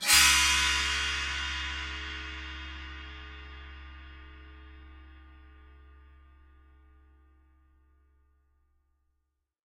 Crash Swash 02
Cymbal recorded with Rode NT 5 Mics in the Studio. Editing with REAPER.
paiste, meinl, drum, ride, metal, sound, bowed, percussion, cymbals, sabian, groove, hit, special, cymbal, swash, one-shot, drums, bell, china, zildjian, sample, beat, crash, splash